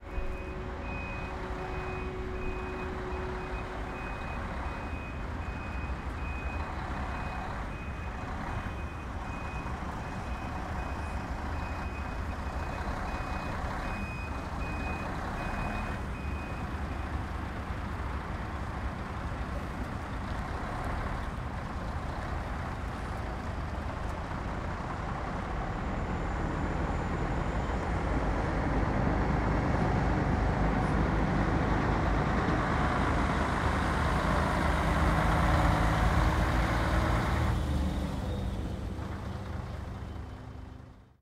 Bus Parking
bus, parking, vehicle